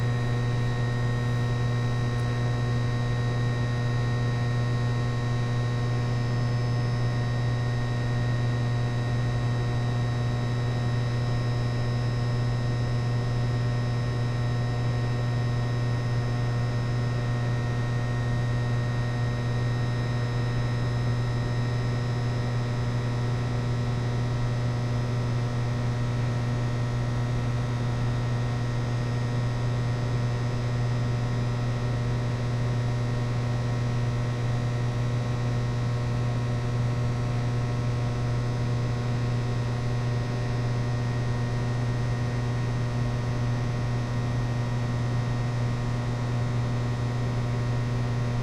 metro subway Montreal electric hum with fluorescent light buzz
hum, light, fluorescent, buzz, metro, Montreal, electric, subway